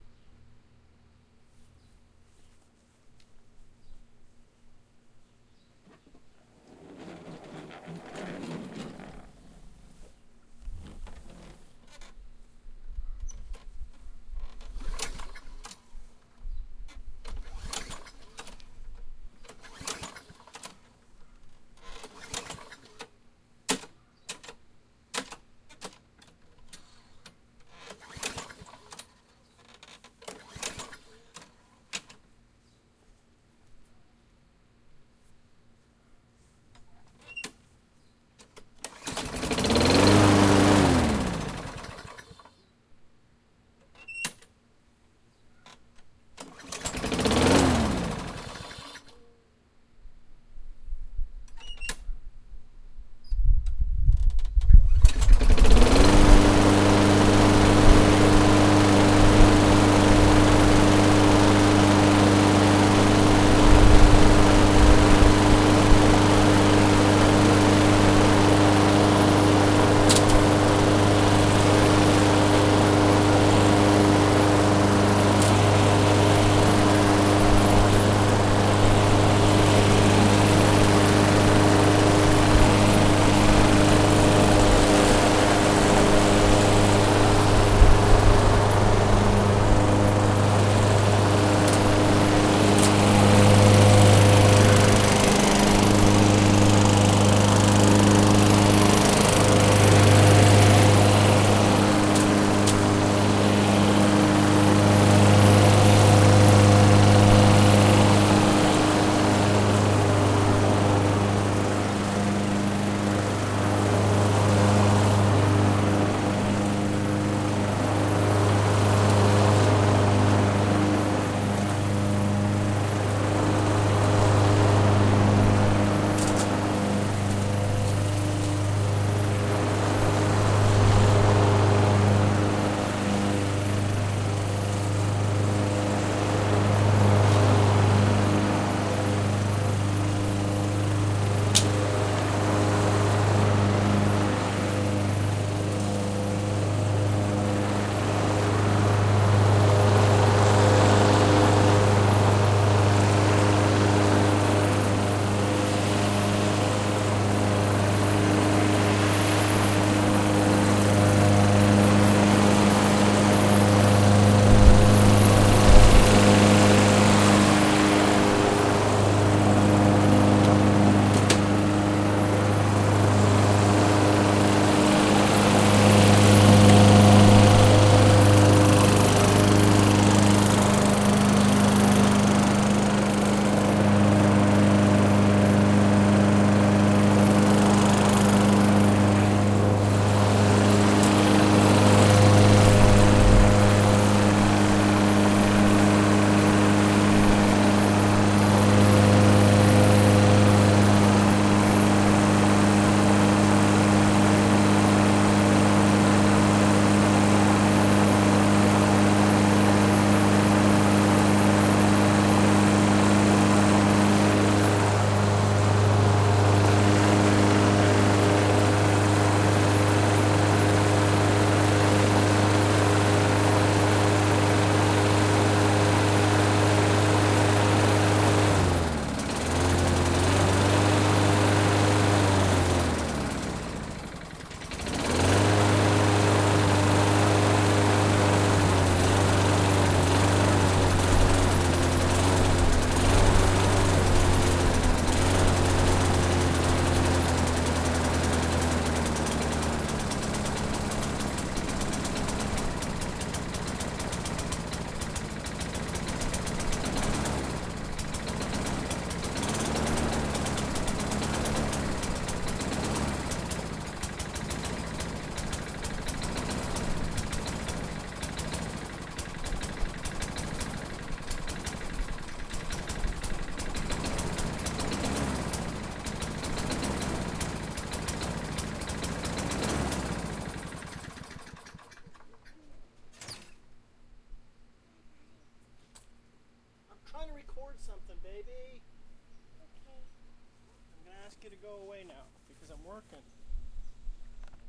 Raw lawn mower recording. Cutting grass with lawn mower. No more than 20 feet from the microphone. Elements were used in a Dallas production of Alan Ayckbourn's "House and Garden". Recorded in my back yard with Zoom H4
motor, mechanical, engine